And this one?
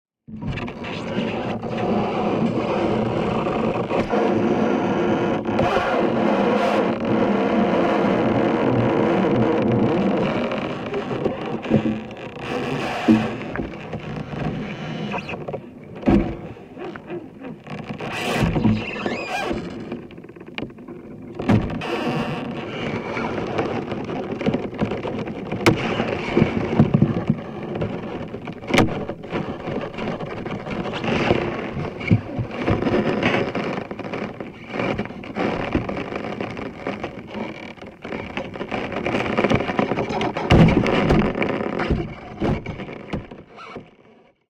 A hydrophone field-recording of sections of ice being levered against the main body of ice in a pond.DIY Panasonic WM-61A hydrophones > FEL battery pre-amp > Zoom H2 line-in.
crack, creak, field-recording, groan, hydrophones, ice, lever, spaced-pair, squeal, thump, trosol, water